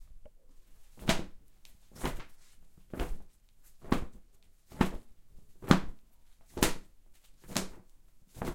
13FMokroluskyT plachta
Sailing boat - sail